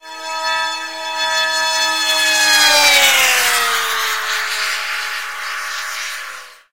Futuristic Car
A fast futuristic vehicle travelling L > R. Reminiscent of a very high revving formula 1.
scifi, vehicle, sci-fi, car, racing-car, formula-1